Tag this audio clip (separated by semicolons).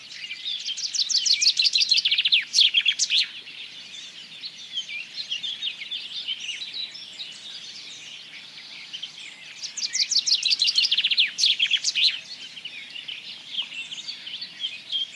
chaffinch; ambiance; pinzon; field-recording; birds; nature; spring; south-spain